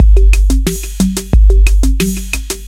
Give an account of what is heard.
tribal 90bpm
Another of my beats. Made in FL studio, using mostly Breakbeat Paradise.